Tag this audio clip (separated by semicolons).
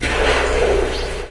bedroom; breath; foley; noises; processed